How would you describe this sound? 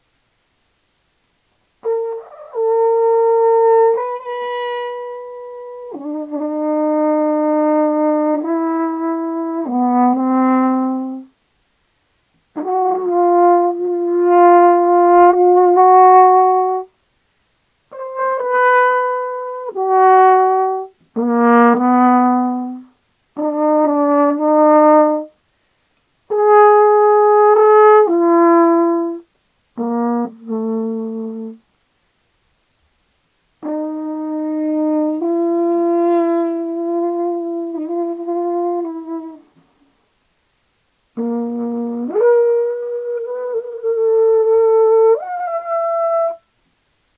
Rhinoceros Trumpeting Musical
Rhinos do not actually trumpet, but in Ionesco's play Rhinoceros they do. This is the sound of a fictional trumpeting rhinoceros created using a French horn and some editing. The rhinoceros is musical and seductive. Thanks to Anna Ramon for playing the french horn.
animal, elephant, french-horn, grunt, musical, rhino, rhinoceros, seductive, trumpet